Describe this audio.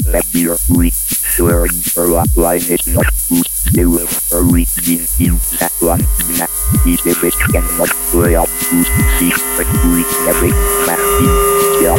Loop 3- BrainFluid
percussion-loop, beat, rhythm, loop